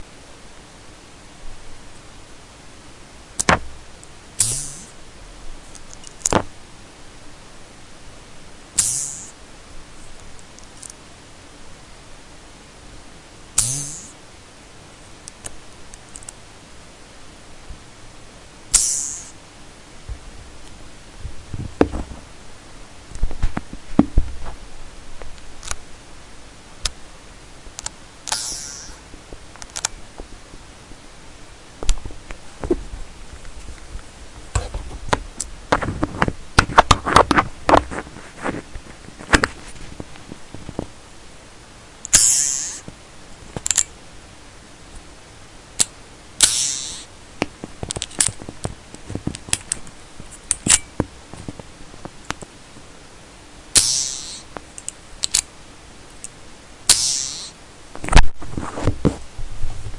metall clip
the sound of a metal butterfly screw on my stative. Recorded with PC microphone and lots of background noise. -- RAW sound
spring, real-sound, effect, vibrato, metal-clip